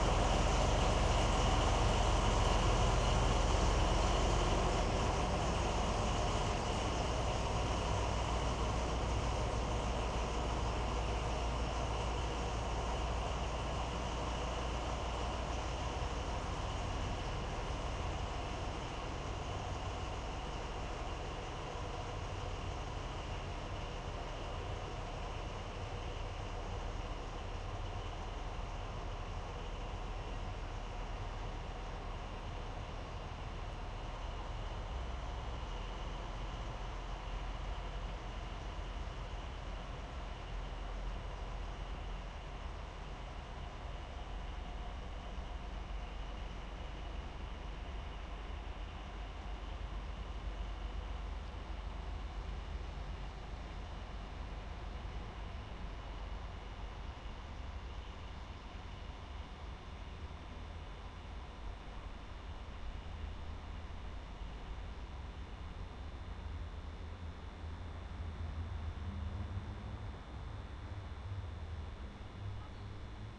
RailStation SmallDieselLocomotiveGoAway--
recording of small maneuver diesel locomotive go away from rec position